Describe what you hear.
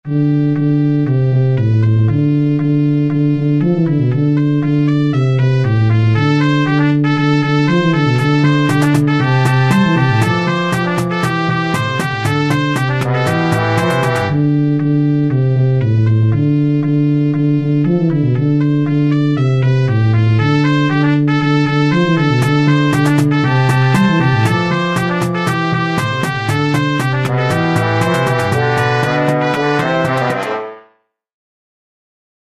The Run - Music
And it's pretty catchy!
catchy, fast, groovy, instrumental, music, short, sporadic